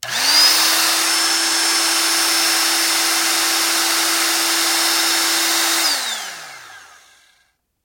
Electronics-Electric Air Pump-01
This sound was taken from an electric air pump that is used to fill an air mattress up. This particular sound was mic more towards the front of the device.
Household, tool, fan, vacuum, pump, electric